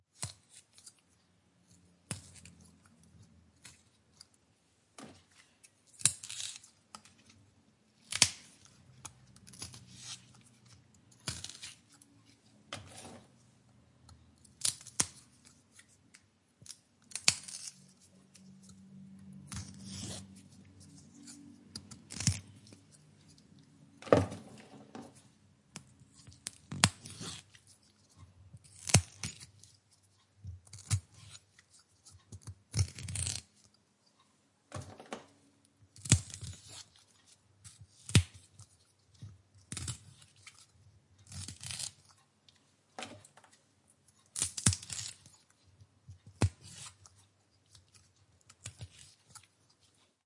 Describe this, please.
Cleaning Snowpeas
Cutting some snowpeas for dinner. Recorded with the internal XY mix of the Zoom H5.